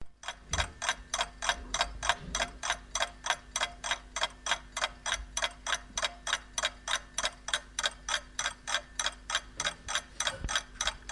Old clock
Recorded with Olympus VN-712PC
tick-tock, old, metal, tick, clockwork, ticking, clock, time, tac, tic, hour, kitchen, tic-tac, domestic-sound